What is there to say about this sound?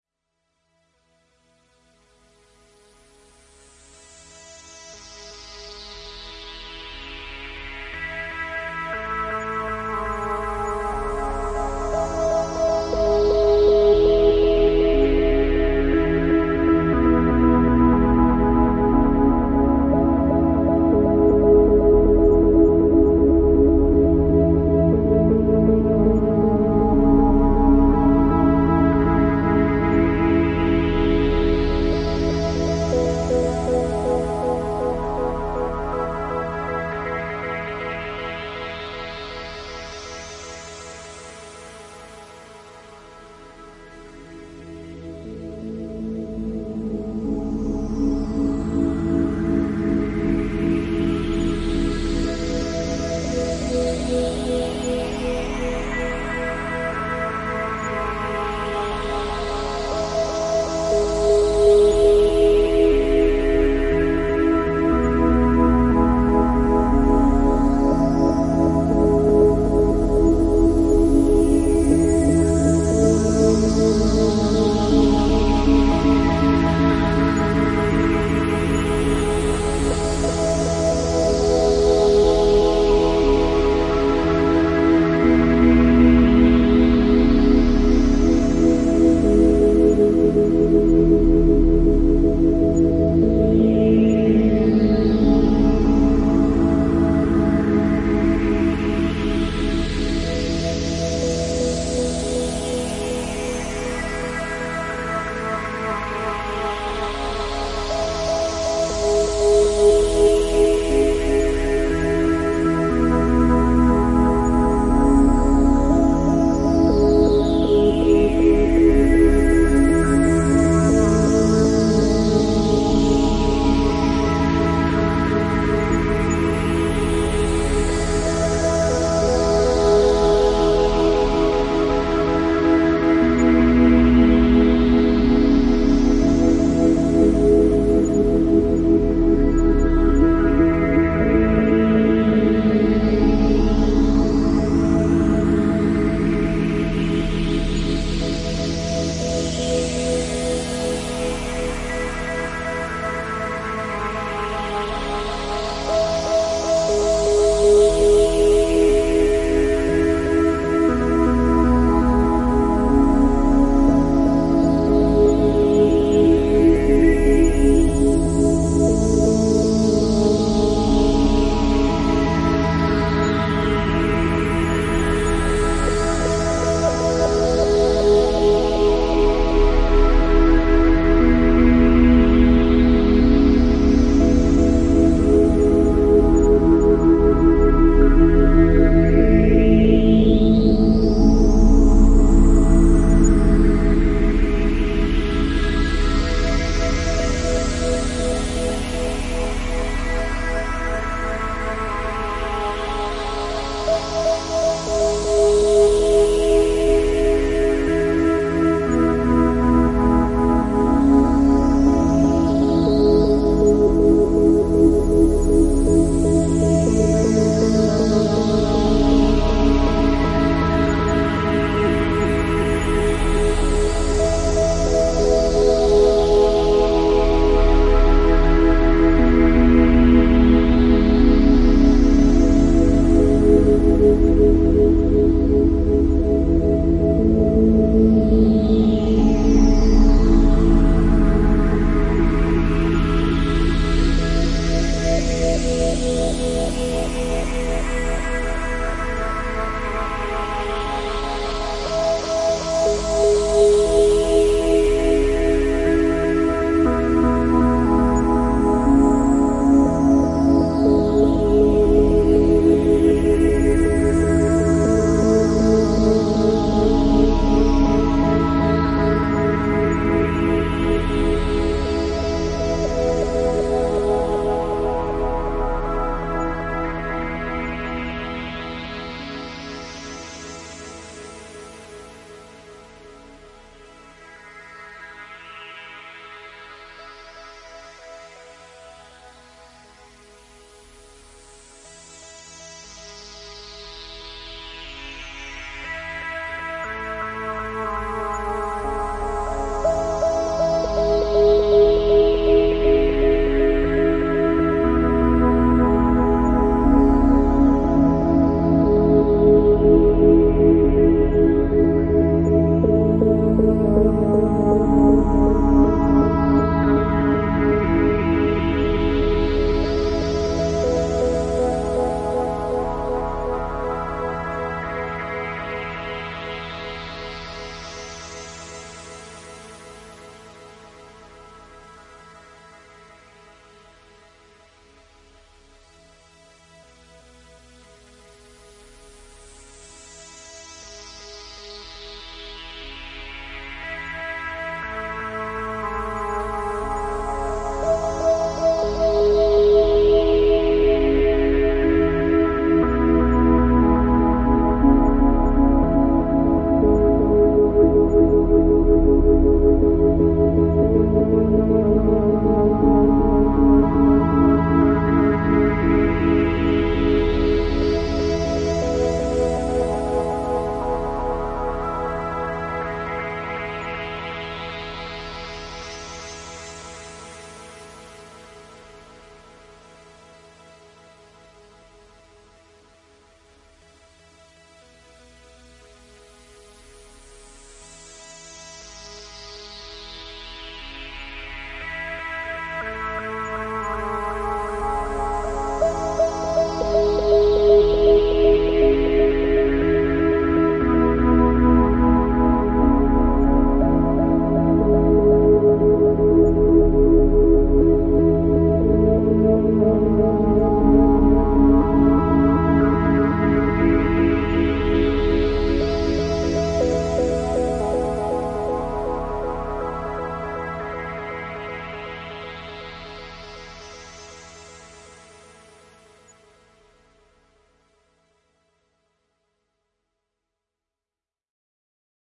Ballistic Transport Extended Mix
A nice, dark, brooding ambient soundscape for use in games, sci-fi, urbex, or horror films.
ambient, analog, atmosphere, cold, dark, electronic, soft, spooky, synth